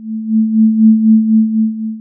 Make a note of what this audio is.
Tremolo
Cheminement:
Création d'un son d'une fréquence de 220Htz et d'une amplitude de 0.8. Application d'un effet Tremolo avec 60% d'humidité. Fondu manuel de l'ouverture et de la fermeture.
Typologie:
Son continu complexe.
Morphologie:
- Masse: son seul complexe.
- Timbre: son terne, froid.
- Grain: lisse.
- Allure: vibrato.
- Dynamique: attaque graduelle.
- Profil mélodique: variation serpentine.